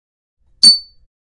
golpe de metal contra vidrio
brillante, golpe, vidrio